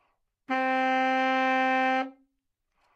Sax Baritone - C3
Part of the Good-sounds dataset of monophonic instrumental sounds.
instrument::sax_baritone
note::C
octave::3
midi note::36
good-sounds-id::5263
baritone, C3, good-sounds